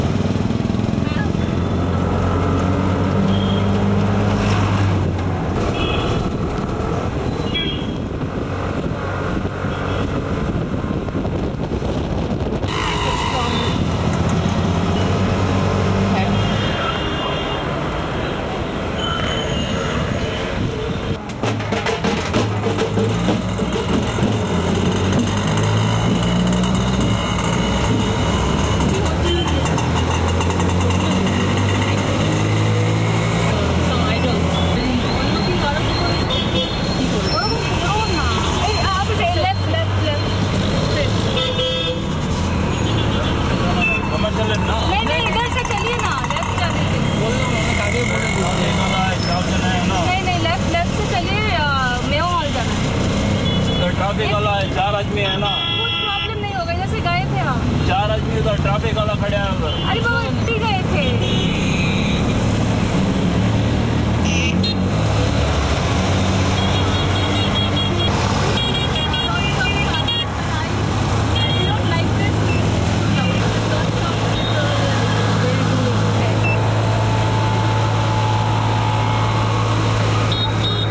India Streets Bangalore City (Tuktuk Ride)
India, City of Bangalore. A Tuktuk ride with lots of traffic noise, honking, indistinguishable voices.
Tuktuk, Drive, Ride, Traffic, inside, Travel, Engine, indistinguishable-voices, City, Transportation, India, Road, Honking, Transport